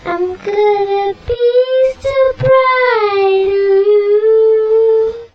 Recorded direct to record producer with clip on condenser radio shack mic. Processed with cool edit... time expanded (stretched).